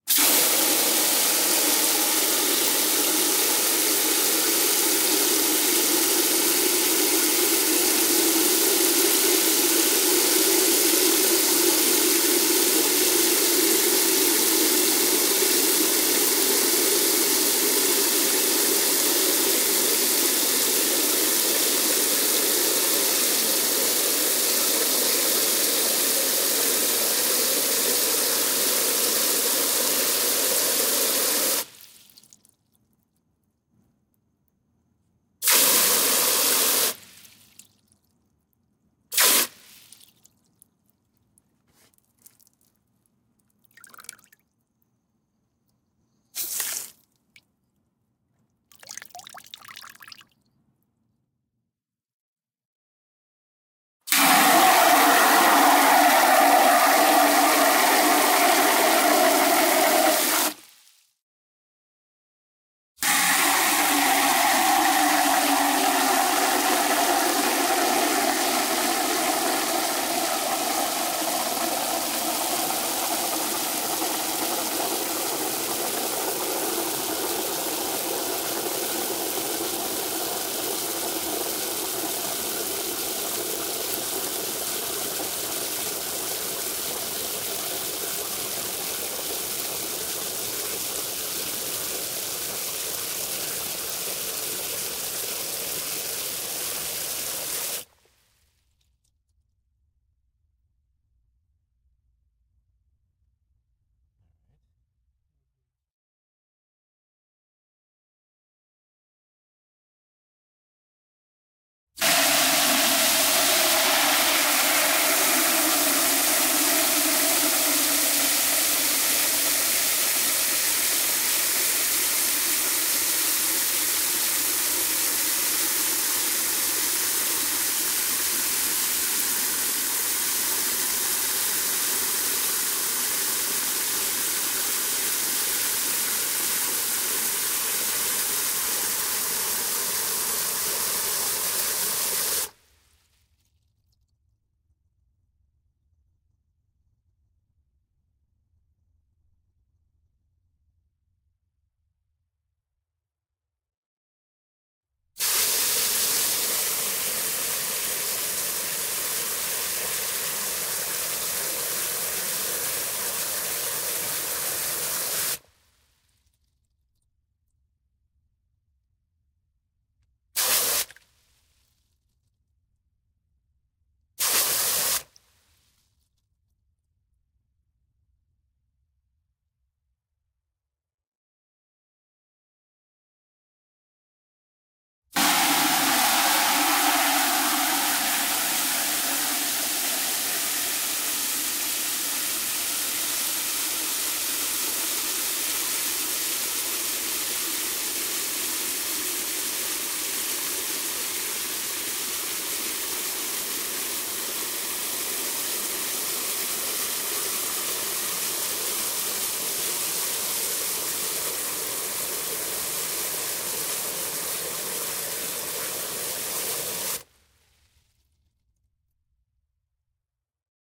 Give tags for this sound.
bucket fill from hose nozzle plastic spray water

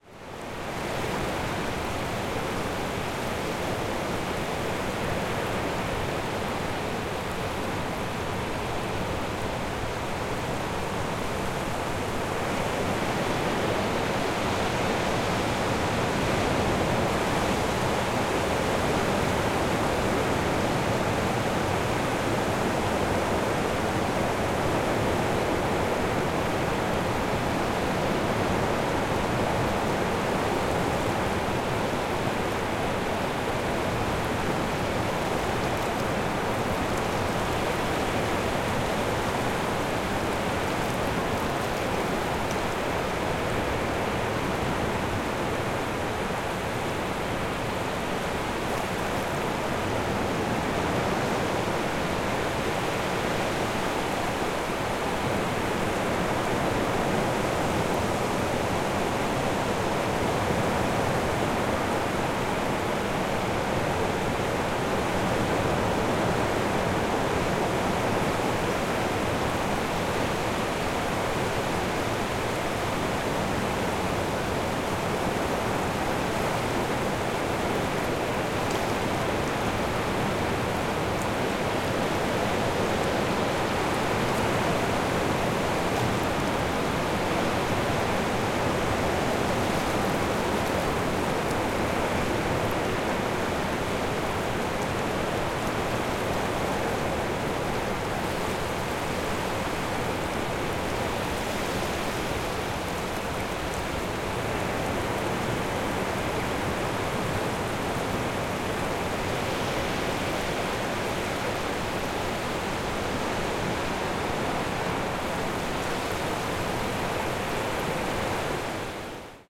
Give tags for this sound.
nature space

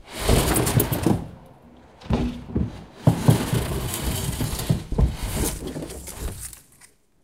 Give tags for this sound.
close window

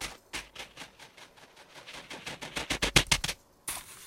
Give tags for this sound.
variable thumps taps random scrapes hits brush objects

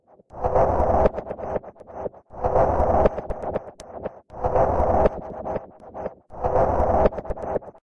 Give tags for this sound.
machine machinery sfx

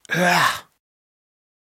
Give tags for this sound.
tart voice ew male

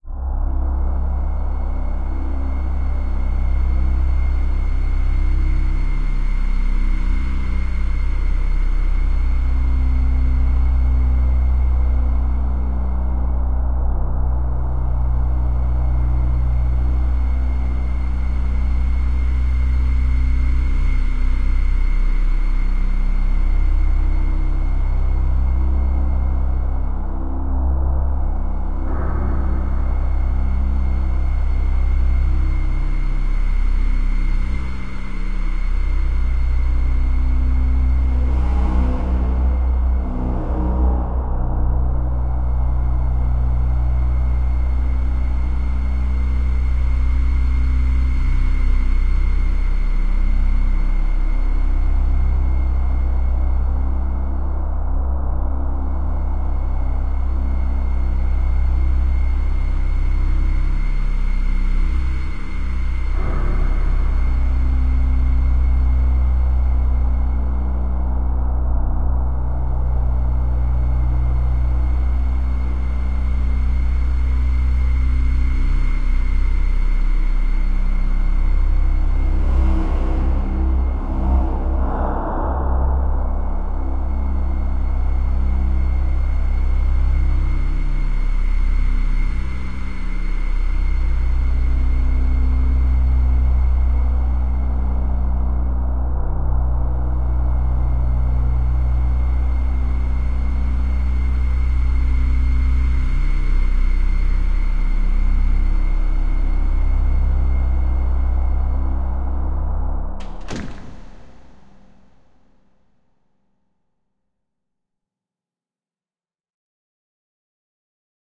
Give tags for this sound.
Ambiance Spoopy Waow

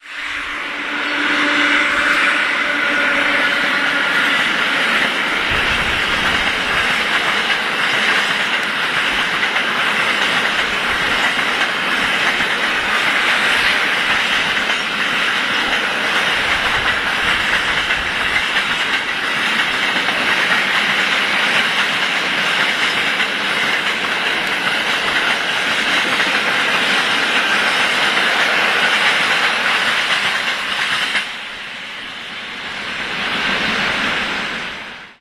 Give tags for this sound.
field-recording noise poland poznan railroad street train

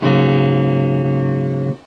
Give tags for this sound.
guitar chord

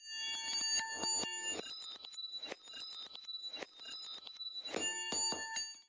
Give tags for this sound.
bell ding key ring

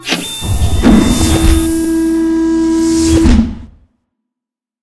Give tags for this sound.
Gears Hydraulics Landing Ship Vessel landing-gears spaceship

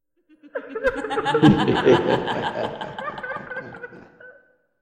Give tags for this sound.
chuckle daemon laughter sinister voice

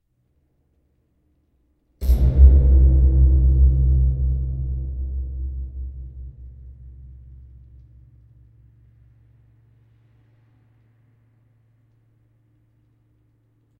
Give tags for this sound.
ambiance anxious apprehension creepy dark deep dread fear frightful ghost Gothic hatred haunted horror macabre panic phantasm phantom rumble scare scary sinister spooky sting stinger story suspense terrifying terror

